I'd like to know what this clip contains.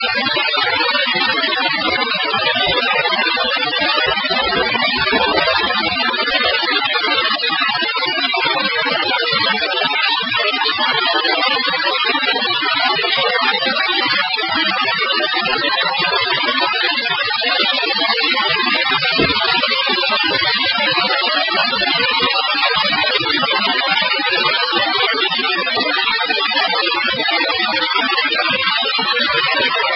Digital Storm
Ever wondered what it the interior of the computer technology sounds like? Me neither. However, I cannot deny that this is melodic if not muffled and low-res.
[This sound was made way back in 2012, but sadly the process on how it was created was lost. This was uploaded to prevent from being lost to time forever.]
Beep! Boop! Beep! Bliep!